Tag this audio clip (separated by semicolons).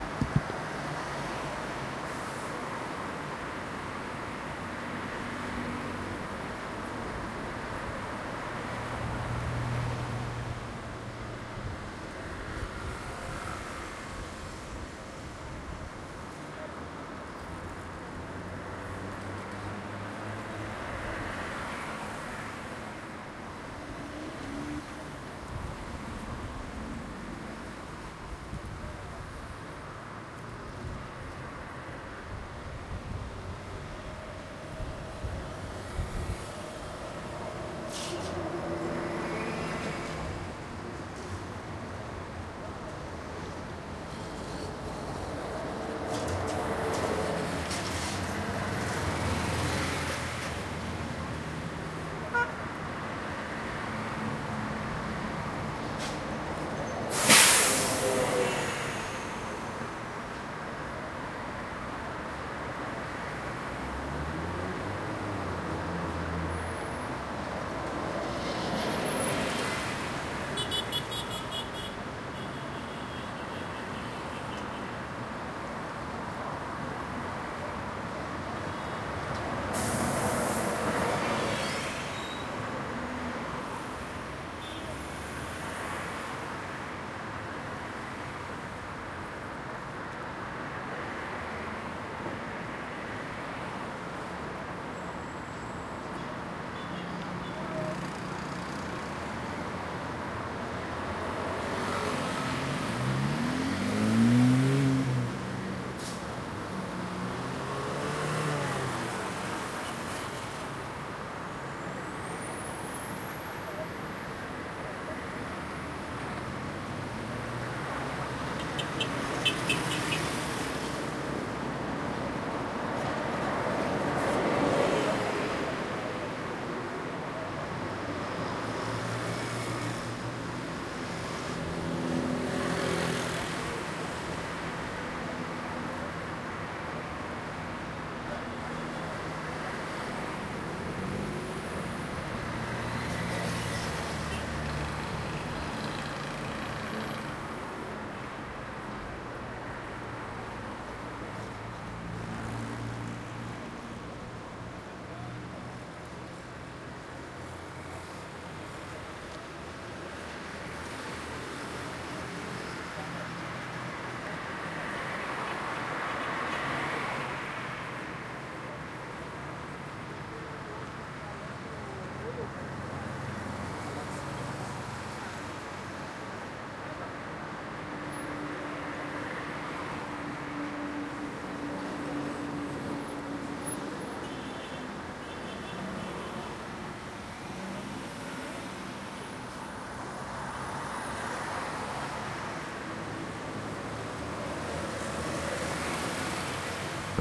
Avenida; Movimento; veis